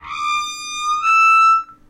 A squeezing door